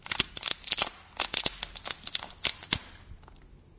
Snapping sticks and branches
Digital Recorder